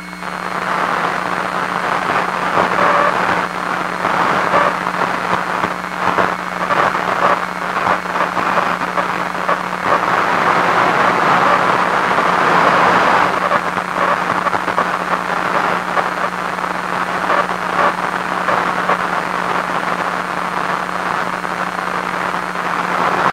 EMI from my desktop computer recorded from Line-in from a 40-year-old Icom IC230 2-meter FM ham transceiver at 146.67 MHZ.